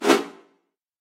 Edited white noise (EQ, attack, release, reverb) to reflect the sound of a sword cutting air. This is one of three alternating sounds. Recorded with a Sony PCM M-10 for the Global Game Jam 2015.